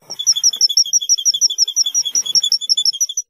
Bird-Like-Chair-Squeak-4
Odd high-pitched squeak from my office chair sounds enough like baby birds chirping to get my cats looking around for them.
iphone, field-recording, chair, squeak, bird, chirp